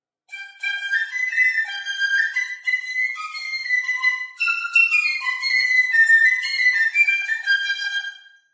Irish Jig
An Irish-Jig snipped I made up played on a wooden fife.
Irish, Irish-jig, Tin-whistle